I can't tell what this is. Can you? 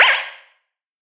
Retro, slipping!
A slip with a retro-feeling to it, for example when the player character has walked on a trap and slipped. In other words - an unexpected slip! Maybe the player character walked on a banana, or on soap?! Or maybe the player character ran too fast?
Just to play with the idea even more - maybe the player character ran too fast but quickly managed to turn to opposite direction?!
If you enjoyed the sound, please STAR, COMMENT, SPREAD THE WORD!🗣 It really helps!
slipping, film, adventure, slippery, fantasy, retro, trap, character, platformer, game, animation, slip, cartoon, skid